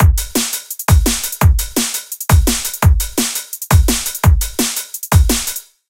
Rhythm 10 170BPM
Drum n' Bass style rave break for use in either Drum n' Bass, Rave Breaks, Breakbeat or Hardcore dance music